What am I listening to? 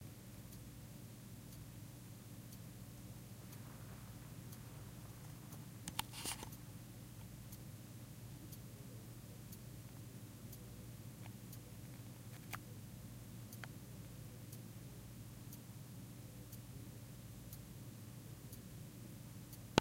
Watch Tick
Recorded with a black Sony digital IC voice recorder.
tick, ticking, time, tock, watch